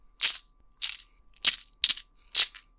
Shuffling some coins